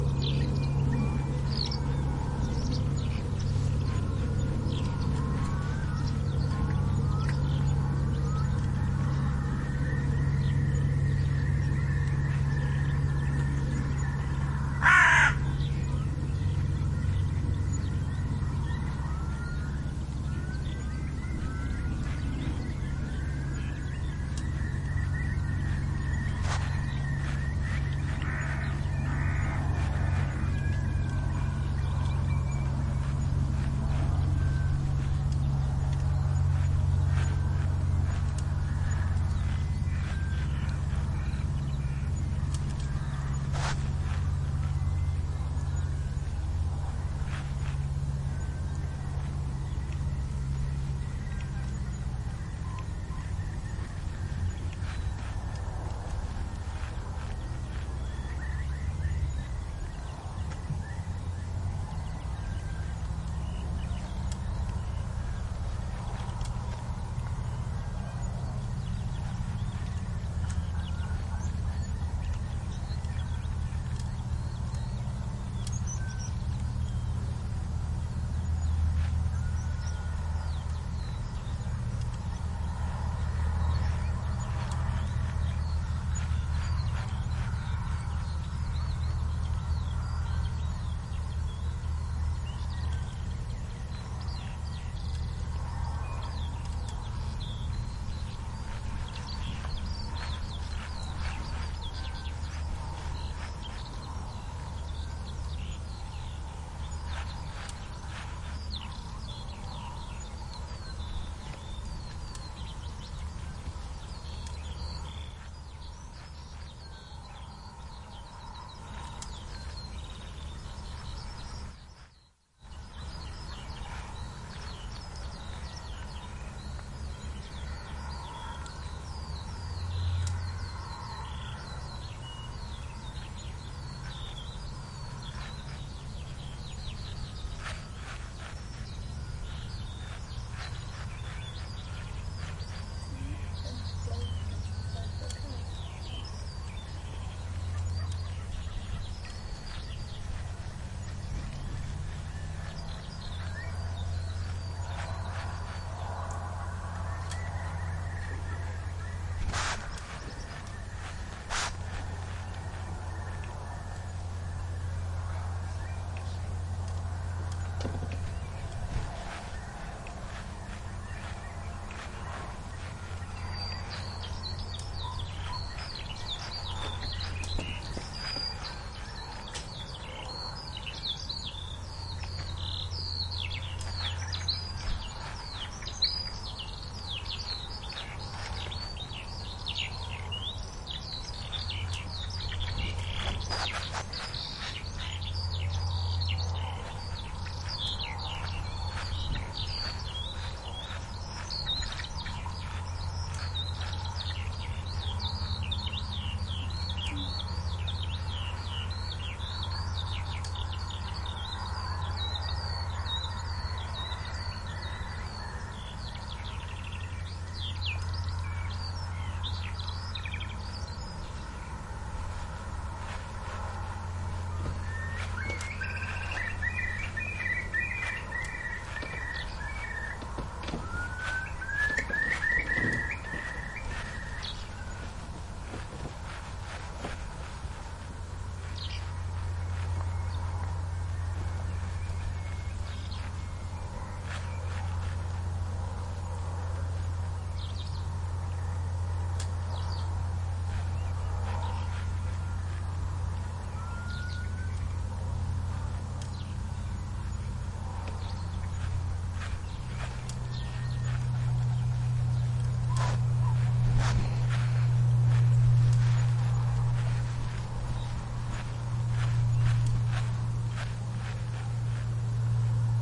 Yorkshire Moors
Yorkshire Moorland April 2018
bird, nature, spring, nesting, field-recording